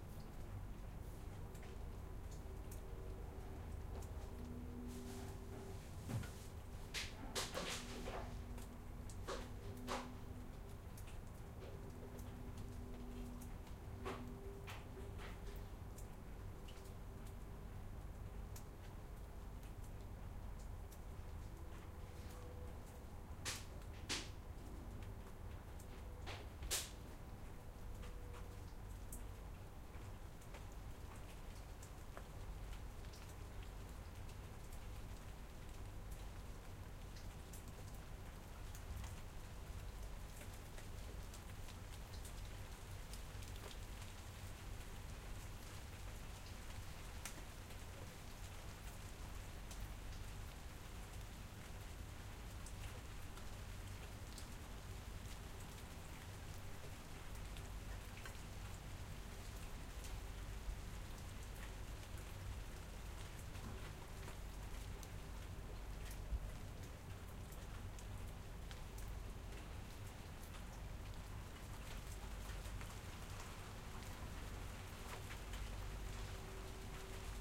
Light rain in house
Recording of Rain in my house - roof noises - recorded with zoom 6h 120 degree condencer mic.